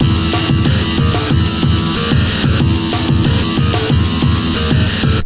SIck BEats from The block -
Sliced and Processed breaks beats and sick rythms for IDM glitch and downtempo tracks Breakbeat and Electronica. Made with battery and a slicer and a load of vst's. Tempos from 90 - 185 BPM Totally Loopable! Break those rythms down girls! (and boys!) Oh I love the ACID jazZ and the DruNks. THey RuLe!
breakbeat, hardcore, sliced, idm, rythms, electro, electronica, acid, glitch, extreme, processed, drums, drumloops, experimental